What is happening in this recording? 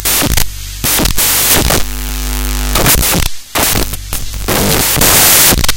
DEF - IndsyPump
Background noise with a swelling "ground loop" style drone. Distortion jumps from channel to channel. A little editing would make a nice "dark" loop.
industrial, sfx, distortion, error, digital